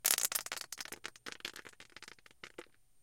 One day in the Grand Canyon I found a deep crack in a cliff so I put my binaural mics down in it then dropped some small rocks into the crack. Each one is somewhat different based on the size of the rock and how far down it went.